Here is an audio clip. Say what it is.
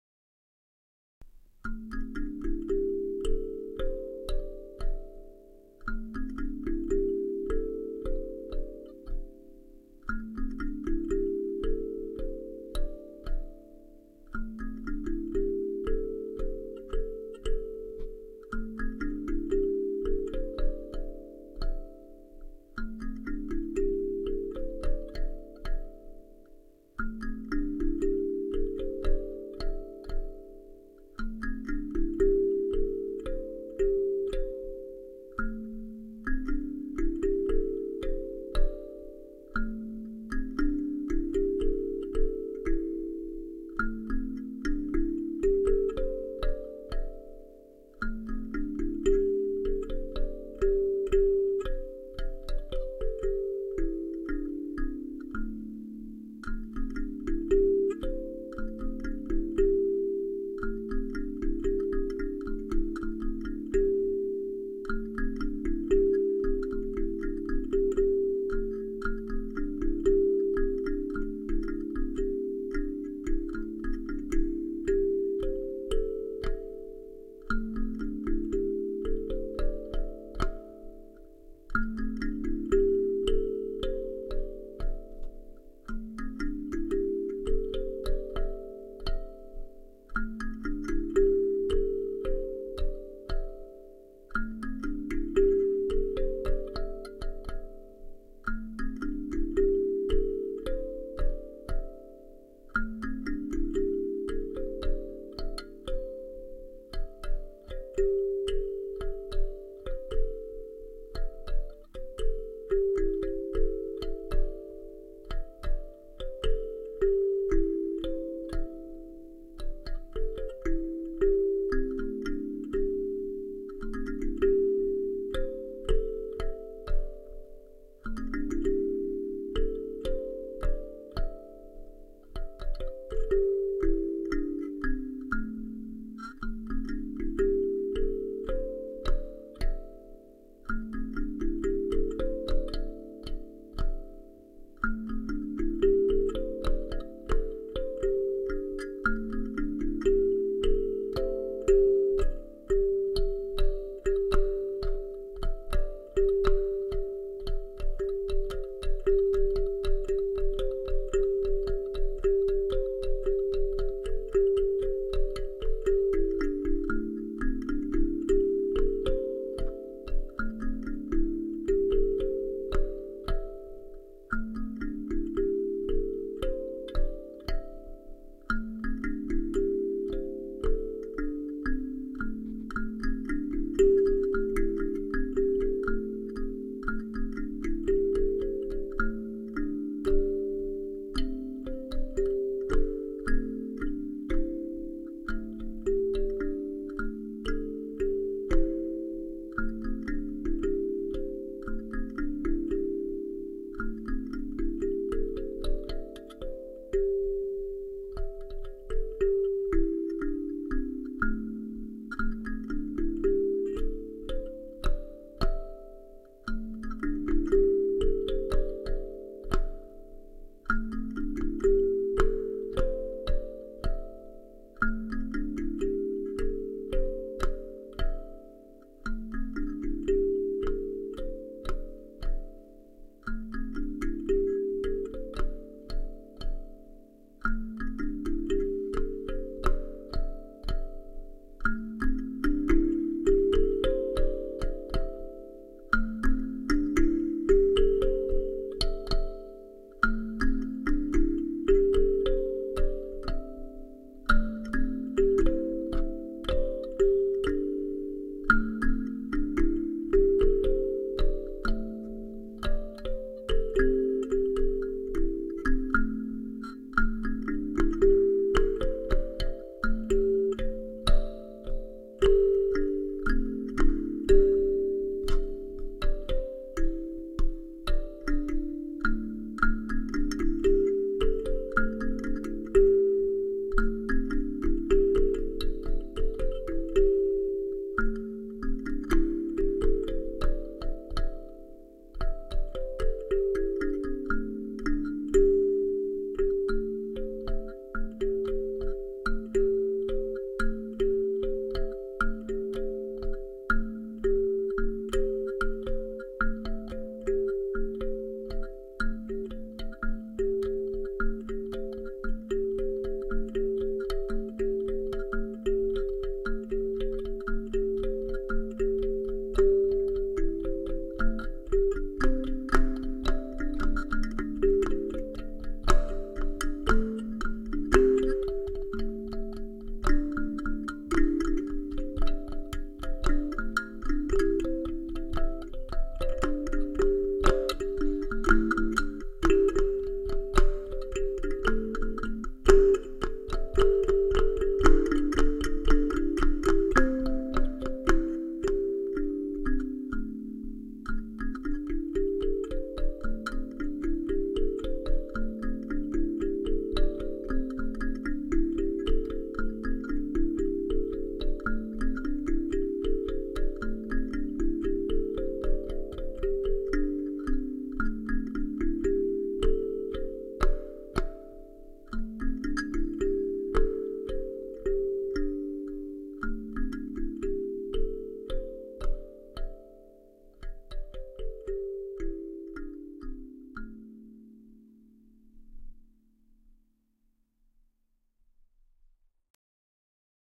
Kalimba wooden
acoustic, wooden